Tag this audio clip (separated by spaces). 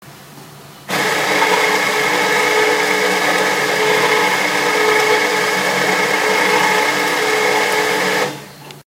TCR field